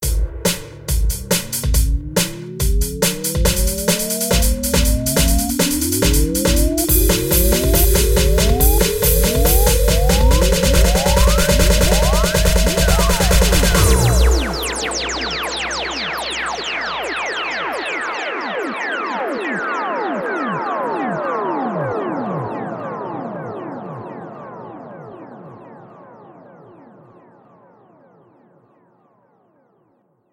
I created these Drum Beat/loops using my Yamaha PSR463 Synthesizer, my ZoomR8 portable Studio, Hydrogen, Electric Drums and Audacity.